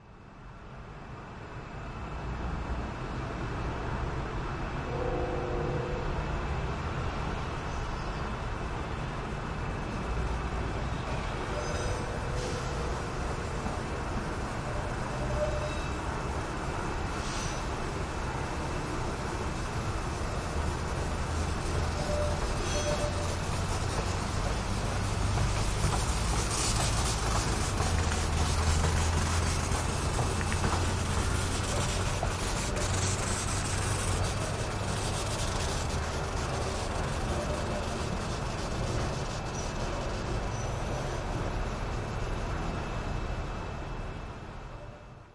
the sound of electricity and a train going by
ambiance, electricity, field-recording, train